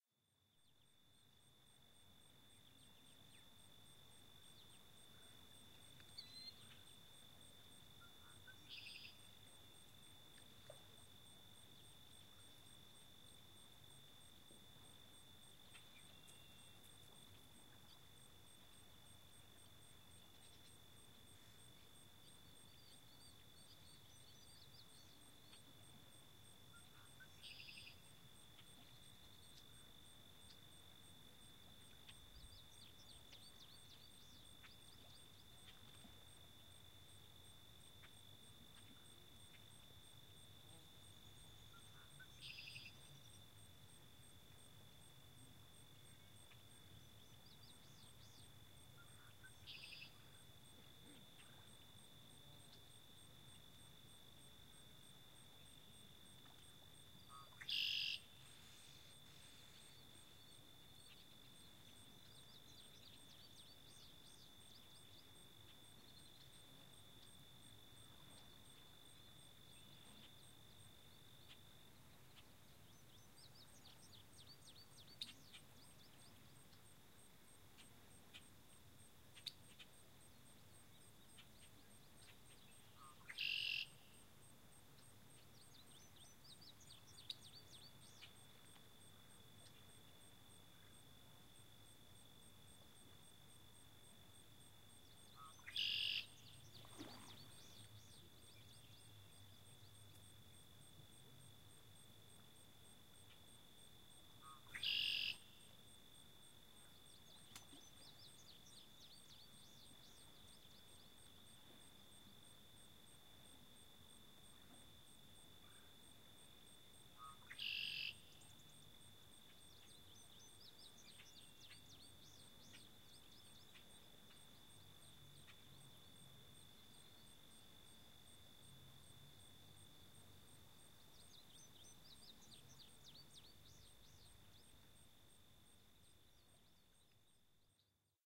This Mississippi river recording was made on a warm June day about 50 feet from the river. The ocassional sound of the river lapping at the soft-sandy bank is peaceful
field-recording, nature-soundscape, Red-wing-blackbird, river, summer